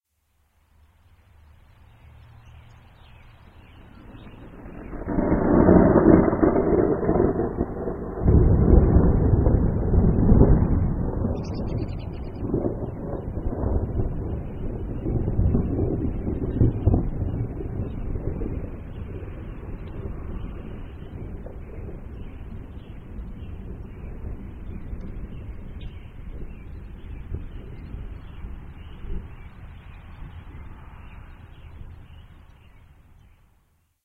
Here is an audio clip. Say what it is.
Thunder and Birds 02
Thunderstorm nearing, with evening birdsong
recordingthunder, birdsong, field